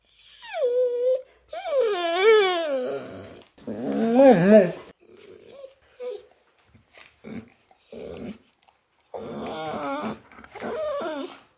Whining sounds of a dog. Recorded with mobile phone.